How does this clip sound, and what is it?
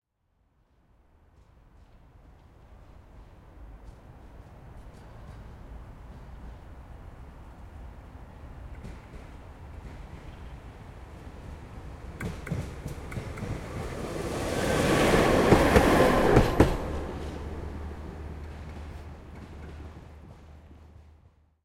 Tram pass away
field-recording, outdoor, public, transport